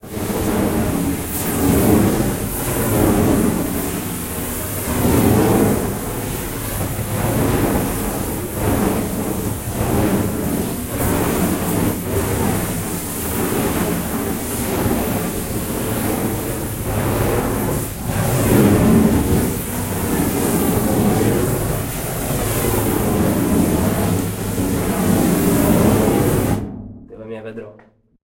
constant spray fire + movement 1
Field-recording of fire using spray-can with natural catacomb reverb. If you use it - send me a link :)
fire, movement, reverb, spray-can